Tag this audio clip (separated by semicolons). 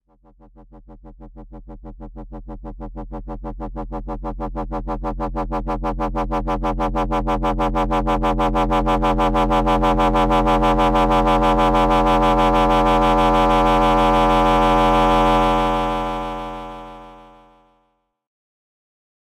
buildup; fm; reverb; thick